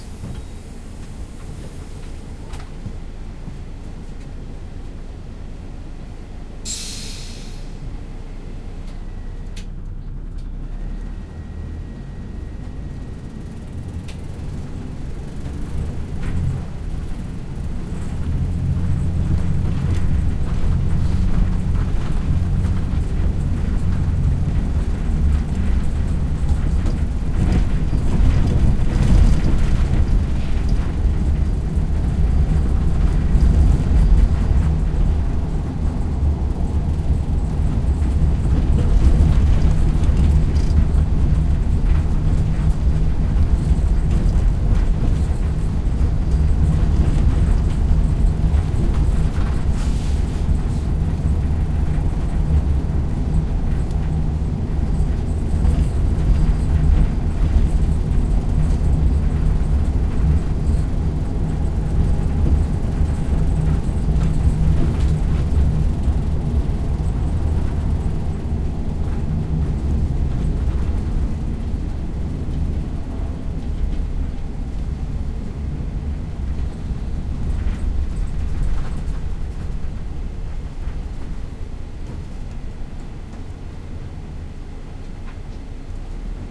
Bus driving
Sound of driving a bus.
engine, inside, people